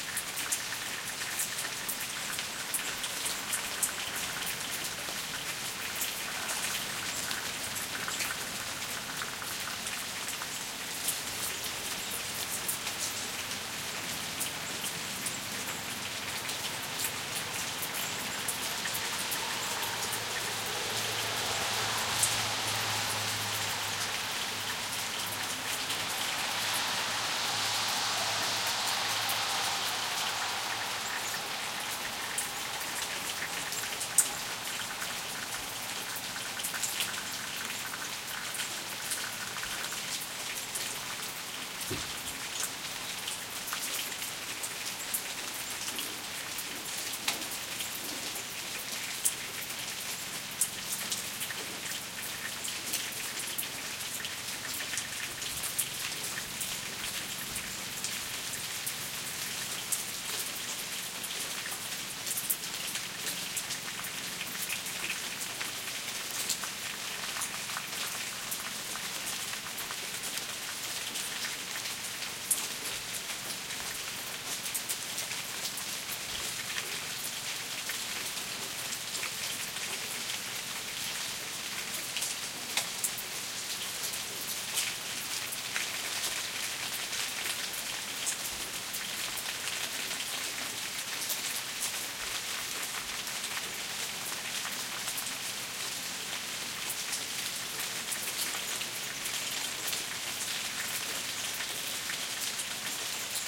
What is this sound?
rain light runoff from metal roof and traffic
from, light, metal, rain, roof, runoff